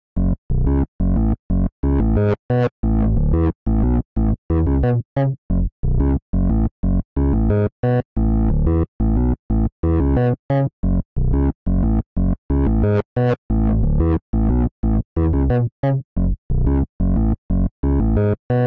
bass made with synthesyser